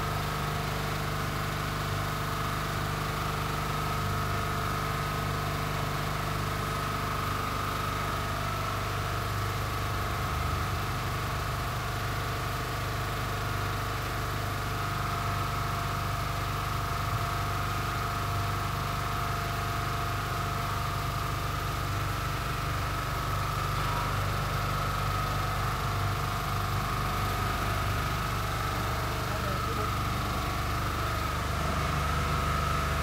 water pump motor nearby2 Saravena, Colombia 2016
water, motor, pump